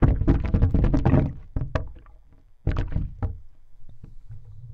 Hose gurgle short
Water draining out of a long garden hose made these funny gurgles when putting an ear up to the hose. Could possibly be used for gastrointestinal distress :0